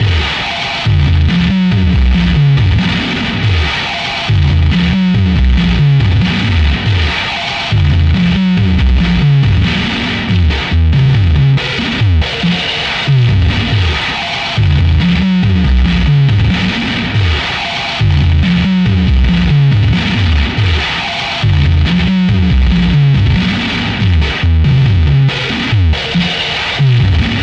75 bpm Acoustic Nu Metal Attack loop 3
ATTACK LOOPZ 02 is a loop pack created using Waldorf Attack drum VSTi and applying various amp simulator (included with Cubase 5) effects on the loops. I used the Acoustic kit to create the loops and created 8 differently sequenced loops at 75 BPM of 8 measures 4/4 long. These loops can be used at 75 BPM, 112.5 BPM or 150 BPM and even 37.5 BPM. Other measures can also be tried out. The various effects are all quite distorted.
4, drumloop, 75bpm